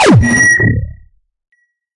Laser missile hit Scifi SFX
laser, SFX